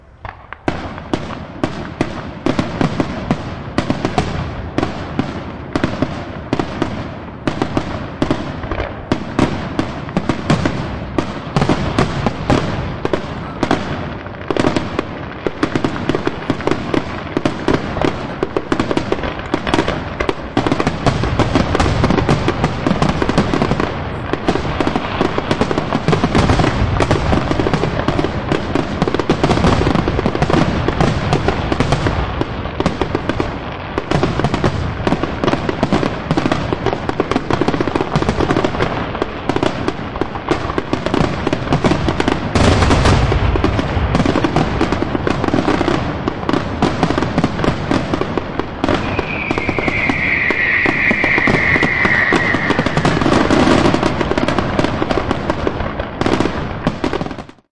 Fireworks Finale
A fireworks display in Laurel, Montana. Recorded in stunning 4 channel stereo.
ambiance, city, field-recording